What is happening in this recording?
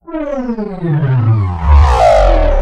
Rise and fall harmor
a riser/faller created in harmor in FL studio.
harmor, heavy, Electronic, Fall